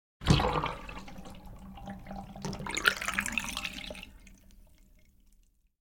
bathtub drain out water
The sound of water drained out of the bathtub.
Recorded with the Fostex FR-2LE and the Rode NTG-3.
tub, out, drain, faucet